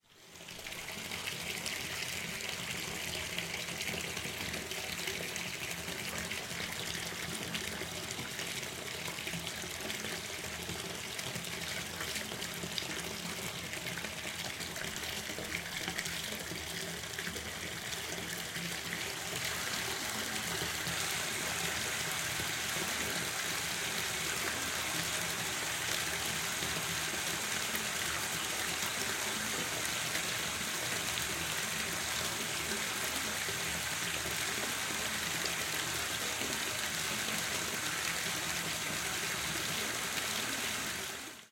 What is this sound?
Bath Tub Running Water
Running water filling up a bath tub.